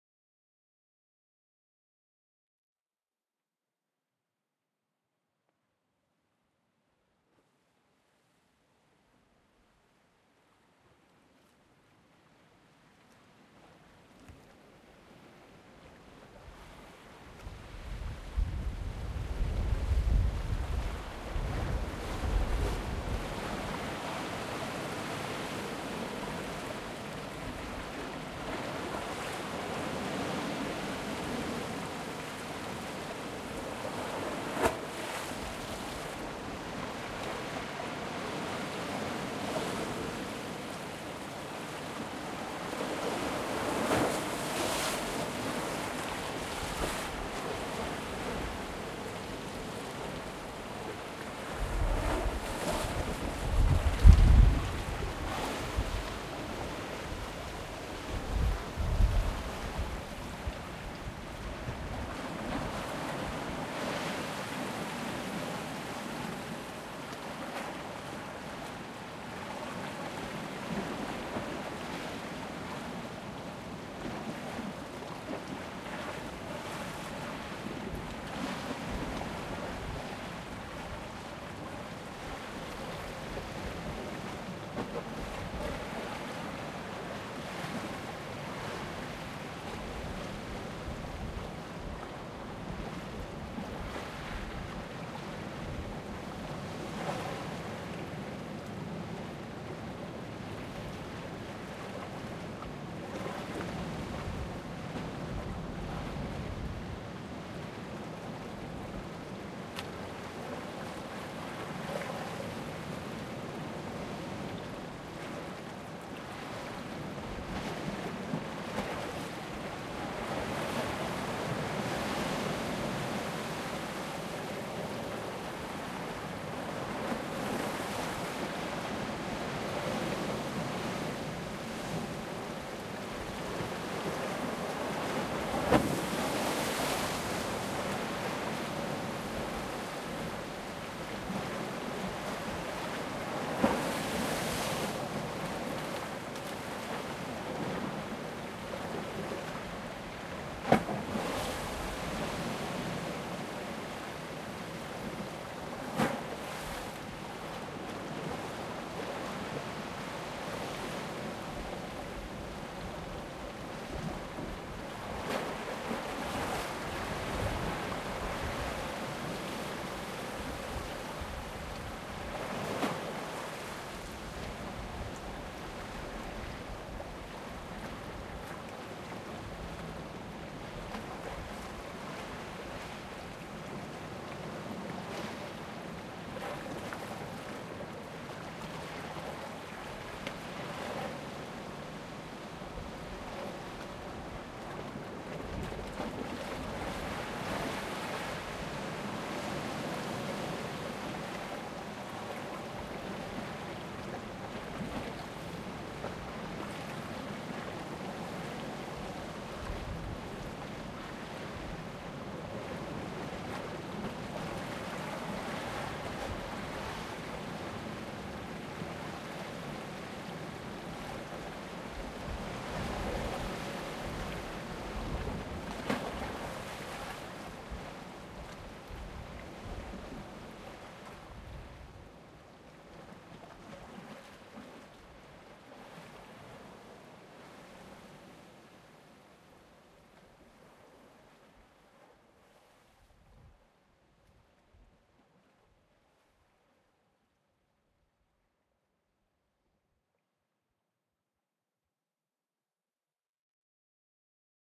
Sea recorded from Seashore (far)
date: 2011, 08th Dec.
time: 11:00 AM
gear: Zoom H4 | Rycote Windjammer
place: Tonnara Florio (Favignana - Trapani, Italy)
description: Recording made during the Ixem festival 2011 in Favignana island (Trapani, Sicily). Shot closer to the sea shore. The sea is very rough and you can hear the waves crashing on the rocks and the wind.